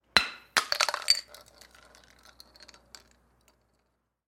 bottle beer drop fall floor or concrete bounce roll beer pour out
beer, bottle, bounce, drop, roll